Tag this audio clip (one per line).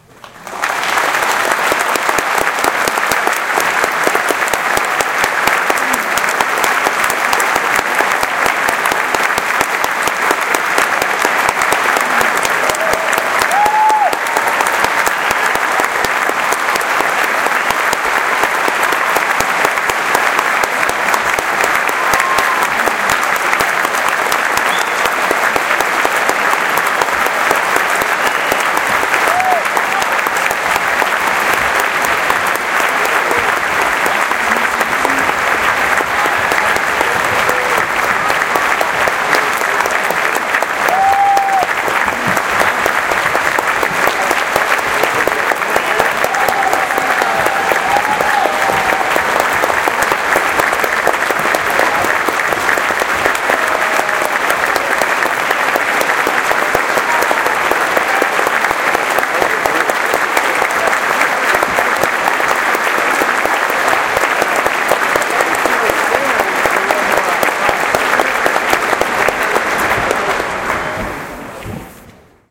cheering
long
appreciation
whooping
whoop
group
cheer
clapping
audience
concert-hall
applause